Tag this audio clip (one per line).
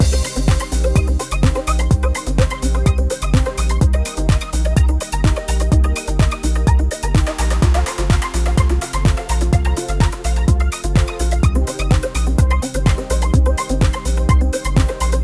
loop
thick
backgroud